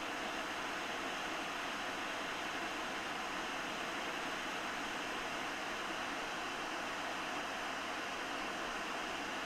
Sound of an unused radio frequency on the FM band. Recorded with a Zoom H5 and a XYH-5 stereo mic.